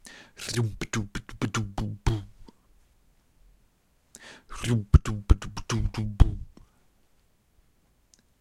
Beat toungy
beat, beatbox, bfj2, dare-19